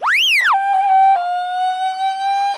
A few notes from a flute were transformed using the HPS model with frequency stretching. A sci-fi 'spaceship' sound is produced.
flute, mono